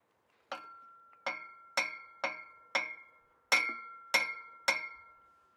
OWI hammer hammering workshop strike